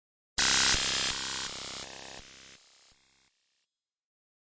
I used FL Studio 11 to create this effect, I filter the sound with Gross Beat plugins.
fxs, electric, future, robotic, lo-fi, computer, digital, freaky, fx, sound-design, sound-effect